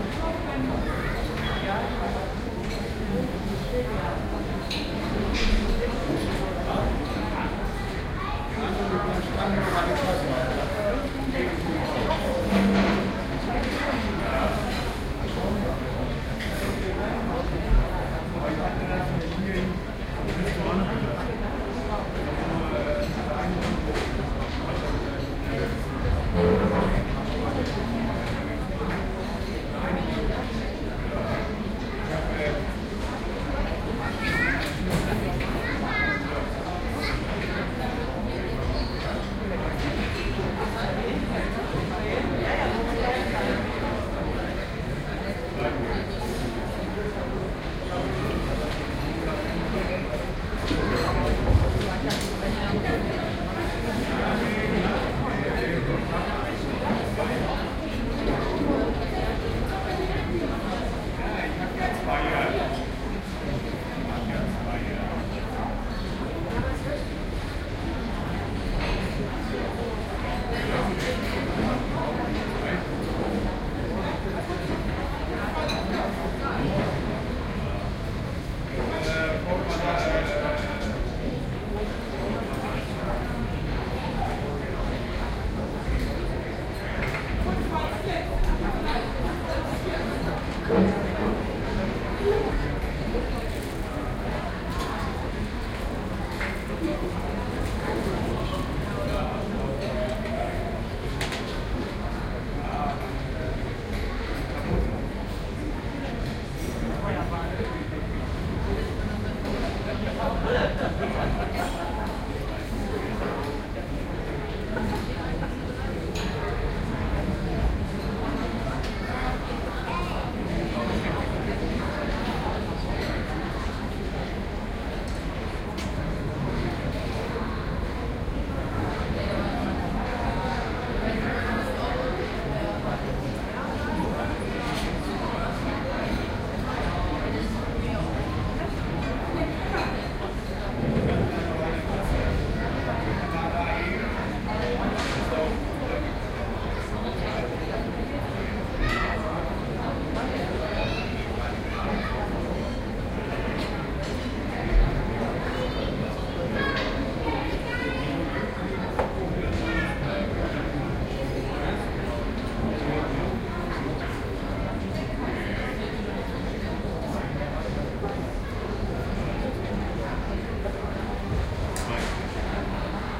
IKEA Cafeteria
A short clip of the Cafeteria of an IKEA store in Germany, using the Sony HiMD MiniDisc Recorder MZ-NH 1 in the PCM mode and the Soundman OKM II with the A 3 Adapter.
crowd, restaurant, binaural, ikea, field-recording